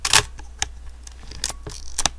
The sound of a snapshot from a Minolta X-700. This is without the Motor Drive. Same as #2, but slower.